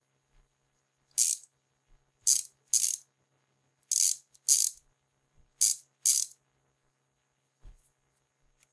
Twisted the thing on my socket wrench